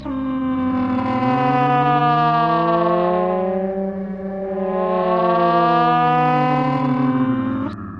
Mangled sounds from Phone sample pack edited with cool edit and or voyetra record producer and advanced audio editor. Gliding pitch shift. Channels independently stretched and reversed.
processed, reverse, voice, female, stretch